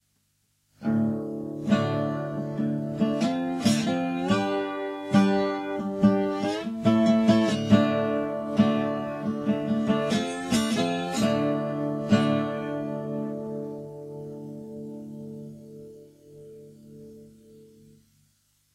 Slide guitar testing
Just testing how the mic is handling the western guitar. Don't mind lousy playing and a guitar slightly out of tune.
guitar, music, slide, test